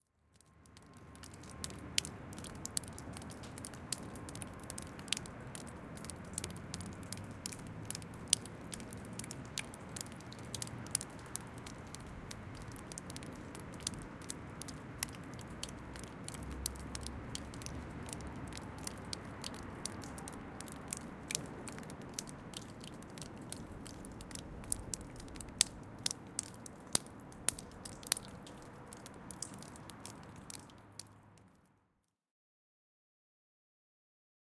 16 hn meltingsnow
Water dripping from melting snow under a staircase.
melting, drips, water, snow